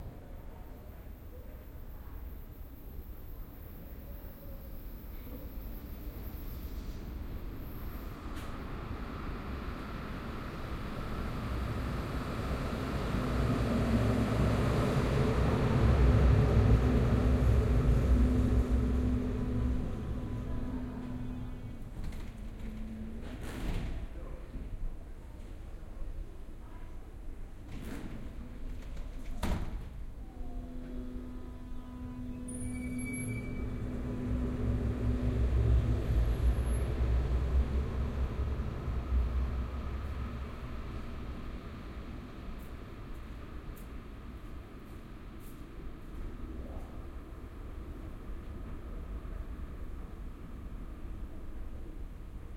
Tramway underground
Down at the tubestation at midnight... well, in Hannover/Germany the tramway goes underground in the city center. This clip was recorded on the 06.12.2005 at Aegi station,using the Soundman OKM II and a Sharp IM-DR 420 MD recorder. The tram arrives and leaves shortly after with not so many people about.
binaural, field-recording, tram, underground